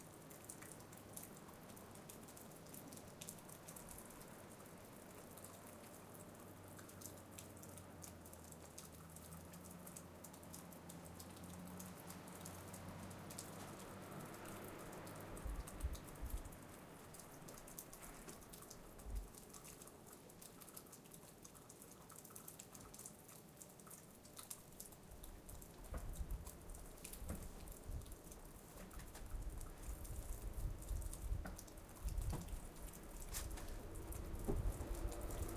Mountain neighborhood - Melting snow
Recorded on a clear day with Tascam DR-100 mkII in the mountains of Park City Utah in late December. Melting snow, wind, distant cars.
cars, field-recording, gust, nature, snow, wind